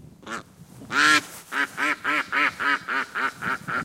duck calling. Recorded near Frutillar (Puerto Varas, Chile)
bird, duck, field-recording, quack